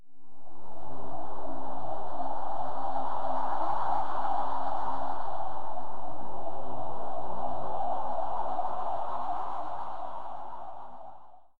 Shimmer Vox CB
drum and bass synth loop dnb 170 BPM key C